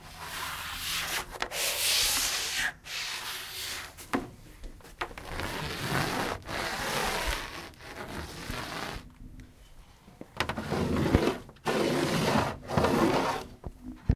Here are the sounds recorded from various objects.
france, lapoterie, mysounds, rennes, rule